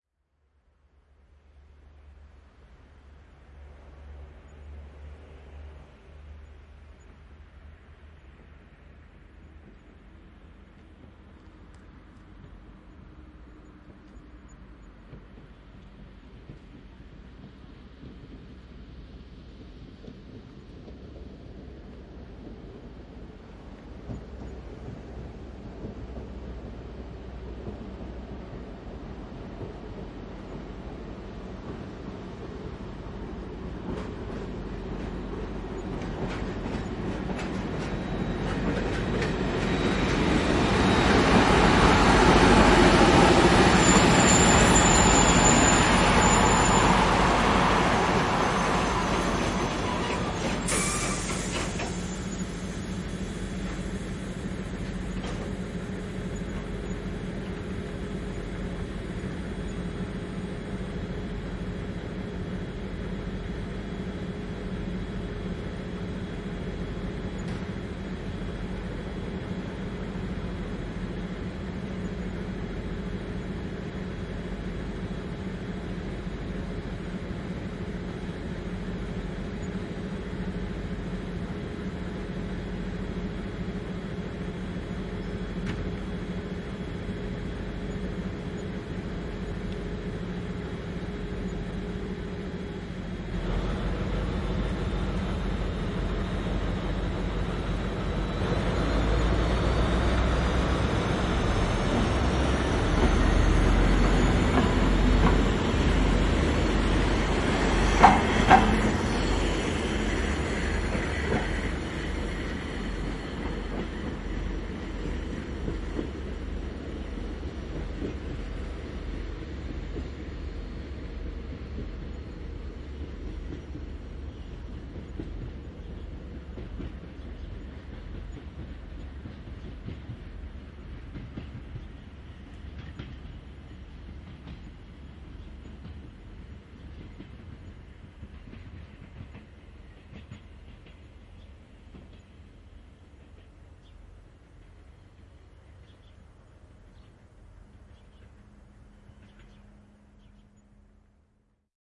Juna, tulo, lähtö / Train, arrival, idling, departure, diesel locomotive
Juna lähestyy kaukaa, pysähtyy, tyhjäkäyntiä, lähtö, etääntyy. Dieselveturi.
Paikka/Place: Suomi / Finland / Vihti, Otalampi
Aika/Date: 01.01.1983